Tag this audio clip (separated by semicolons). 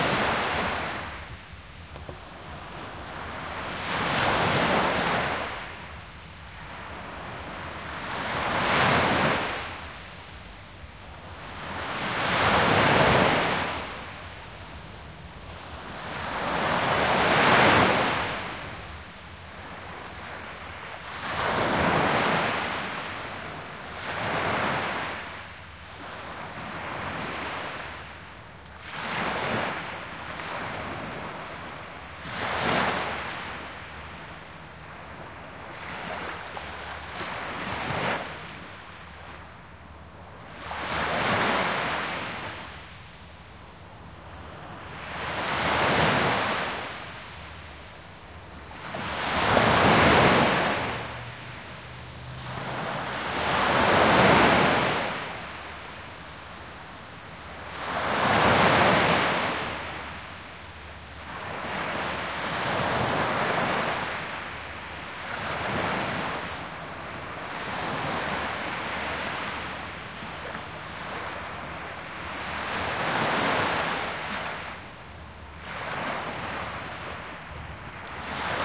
beach; ocean; surf; water; waves